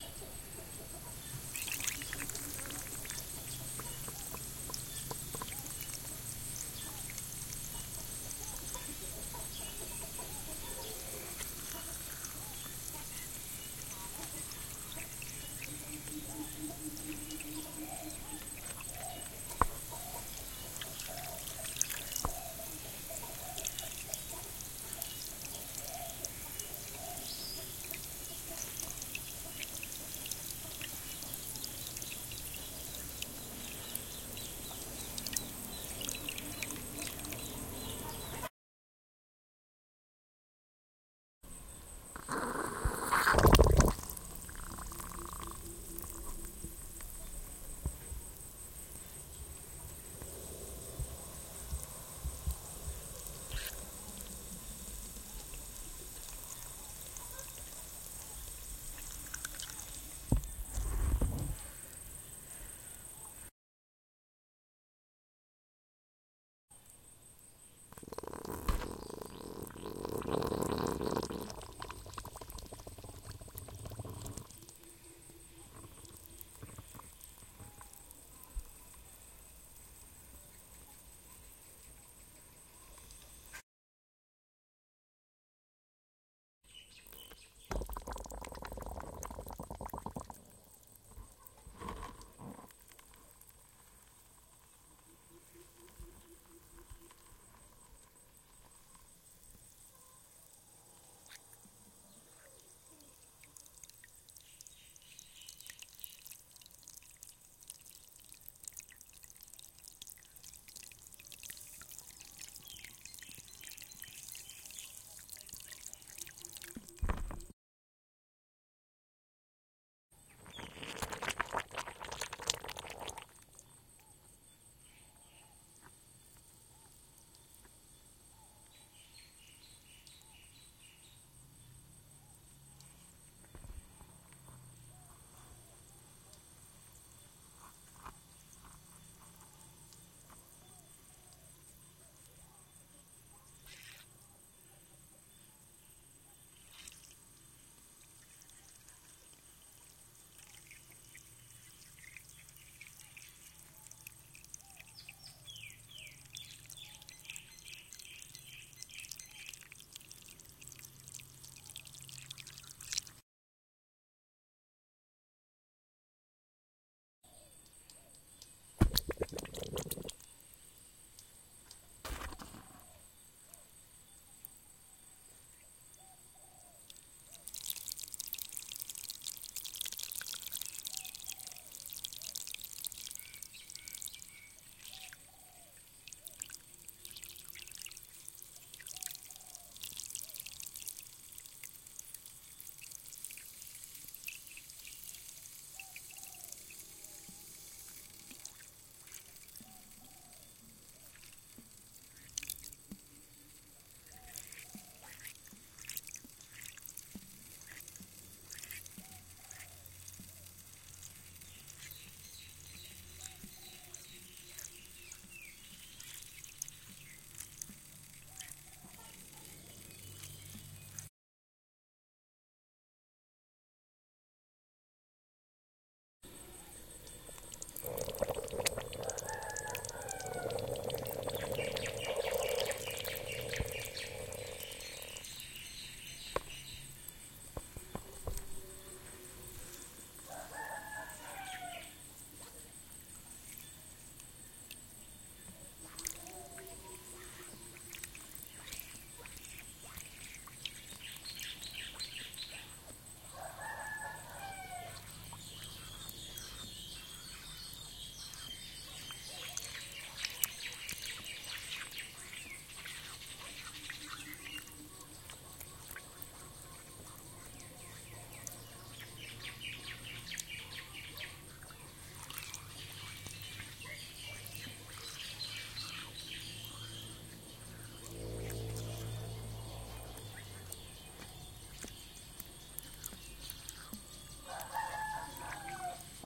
Water sounds
A hole in the ground filling with water
liquid, trickle